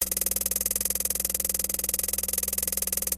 Sound of text appearing on screen as used in many action movies like The Bourne Trilogy etc. Short pulses repeat eachother. Sound was made by recording an electric motor and putting the sound through filters in Adobe Audition.